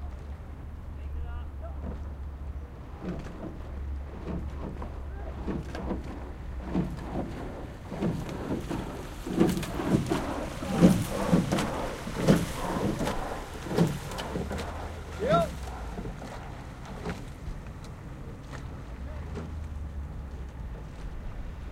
Boat with two or three rowers in it rows by from left to right, heading for its starting position of the boat race.